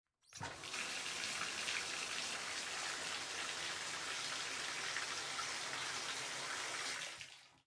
Bathtub turning on
Turning the bathtub on. Recorded with an H4N recorder in my home.